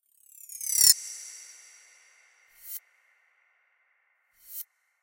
Perc Slide Charged
A percussion based slide FX that sweeps through a pitch fall with delay.
[Format: ]
Cowbell, Drum, Drum-FX, Effect, Hit, Percussion, Percussion-Effect, Percussion-FX, Phrase, Phrasing, Pitch-Slide, Slide, Sweep